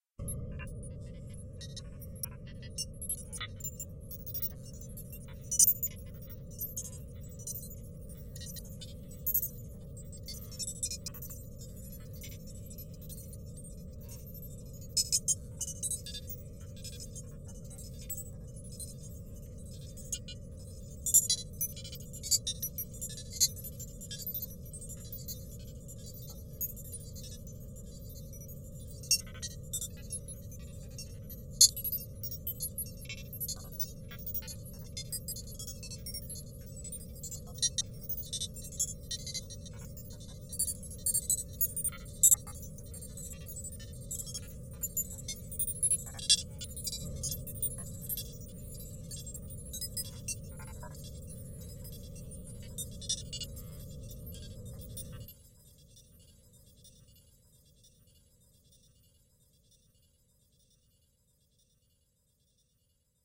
cinestory9shipbridge2
ambience, ambient, atmosphere, background, bridge, dark, deep, drive, drone, effect, electronic, emergency, energy, engine, future, futuristic, fx, hover, impulsion, machine, noise, pad, Room, rumble, sci-fi, sound-design, soundscape, space, spaceship, starship